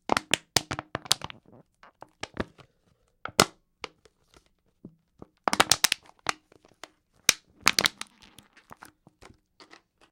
Crushing Pop Bottle
Crushing a plastic soda bottle.
plastic, crush, soda, trash, junk, garbage, pop, bottle